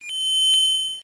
Coin/ringing
This sound was created in SFXR program for your sound developing needs.
Retro, SFXR, bit, Sample, 8